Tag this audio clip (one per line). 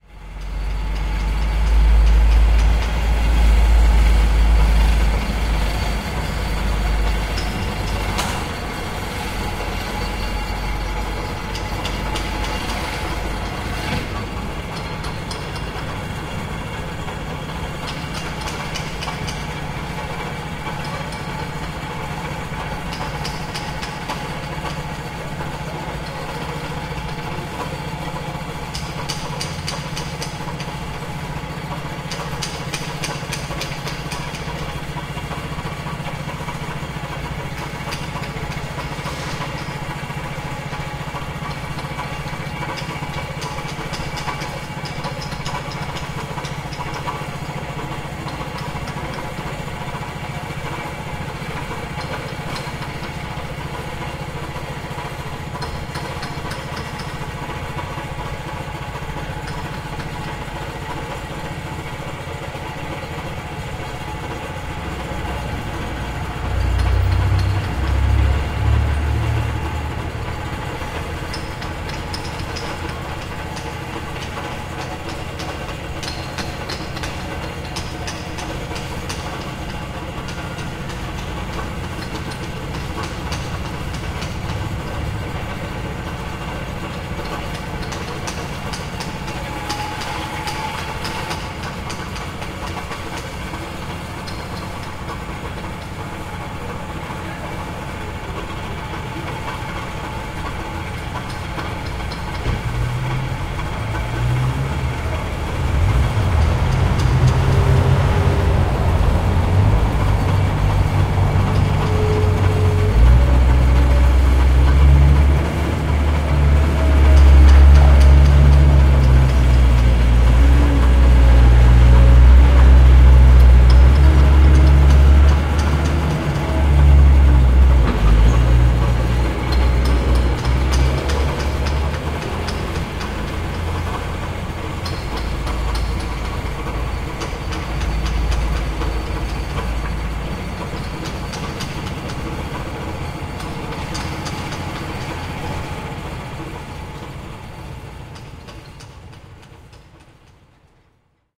city
noise
street
street-noise